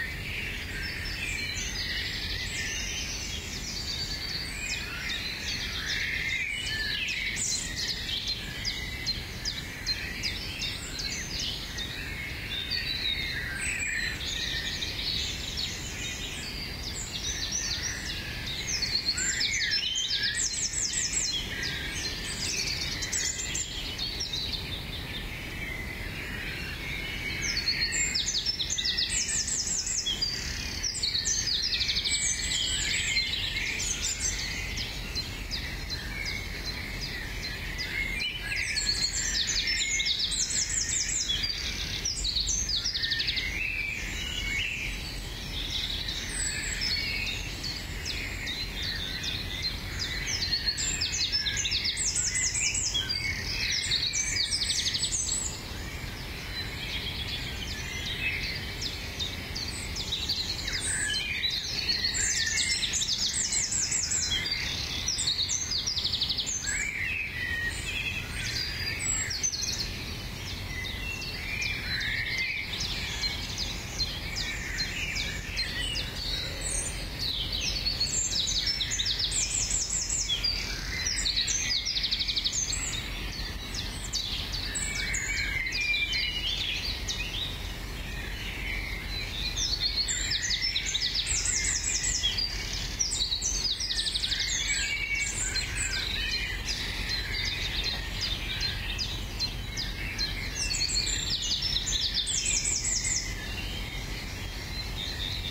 20200603-041812-Bird Song Garden - Early Morning In Suburbs
Birdsong recorded very early in the morning, in the suburbs of a Danish town. Recording made in the month of May.
Scandinavia, ambience, suburbs, animals, ambient, Denmark, field-recording, outdoor, bird, birds, spring, early, morning, nature, birdsong